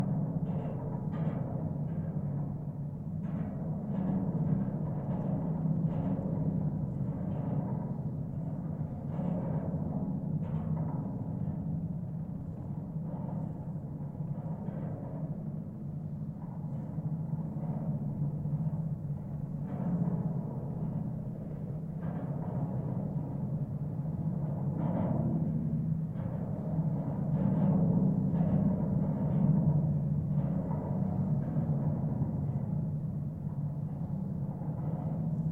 GGB 0313 suspender NE21SE
Contact mic recording of the Golden Gate Bridge in San Francisco, CA, USA at NE suspender cluster 21, SE cable. Recorded February 26, 2011 using a Sony PCM-D50 recorder with Schertler DYN-E-SET wired mic attached to the cable with putty. Near the north tower, sound is dampened and has less cable, more vehicular noise.
bridge, cable, contact, contact-mic, contact-microphone, DYN-E-SET, field-recording, Golden-Gate-Bridge, Marin-County, mic, PCM-D50, San-Francisco, Schertler, Sony, steel, wikiGong